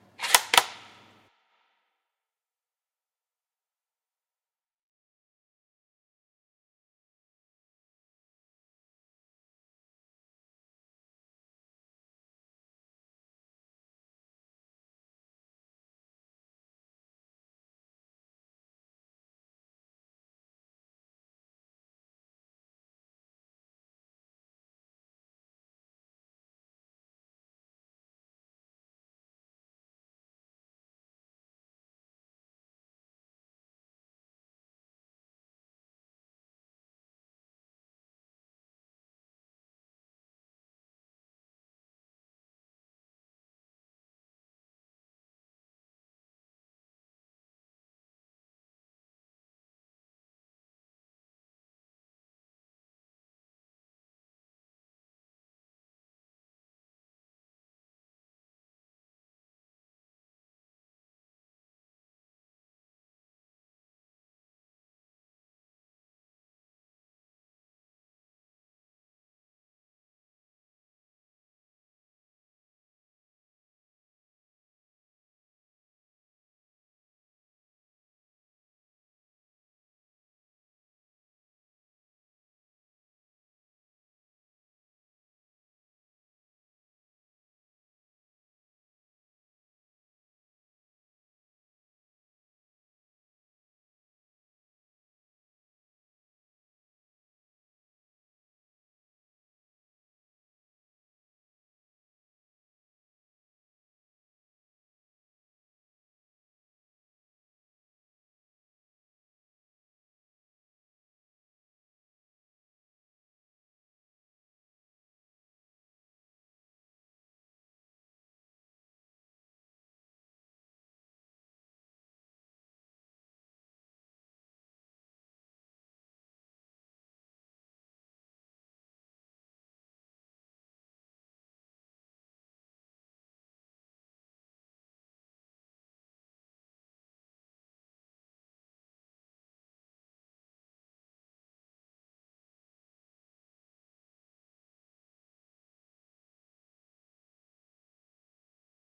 Remington 700 Bolt Forward
A Remington 700's bolt being driven forward.
Action, Remington, Bolt, Firearm, Gun, FX